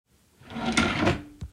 A drawer closing.